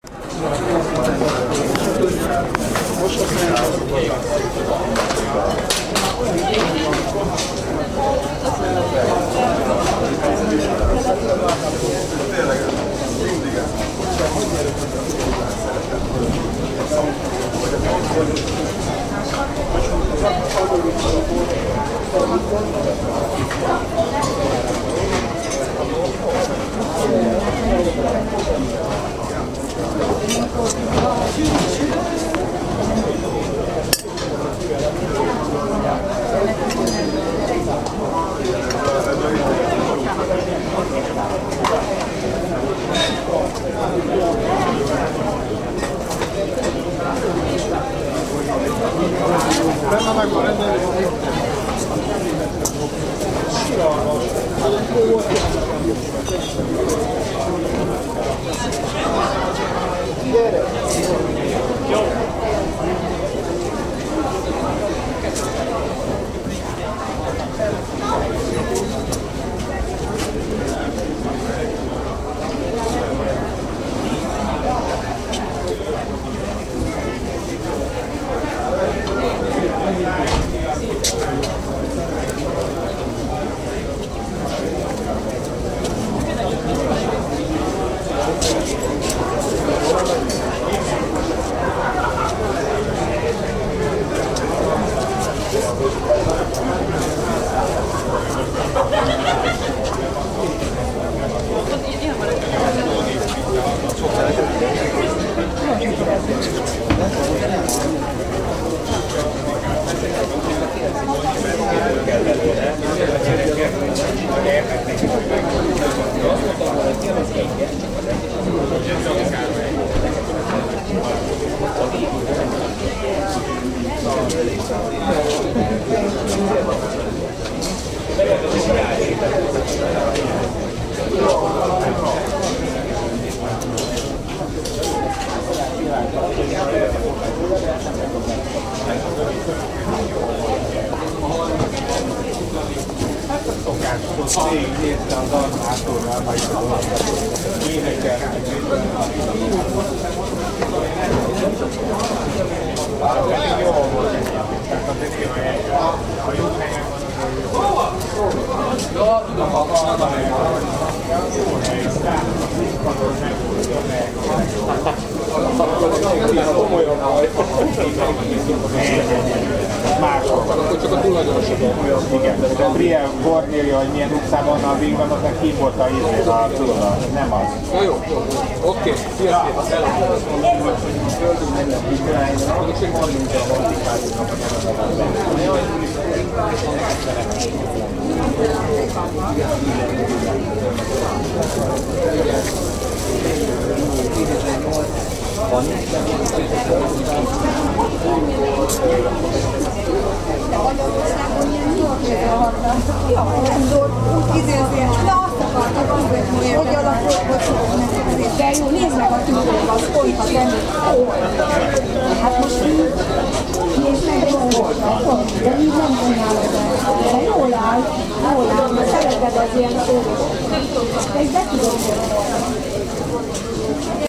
There are more flea markets in Budapest. This sounds made from me in the flea markets of city-park, its name is Pecsa.